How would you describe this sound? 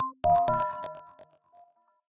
Bright bell tiny sequence, maybe for a minimal techno song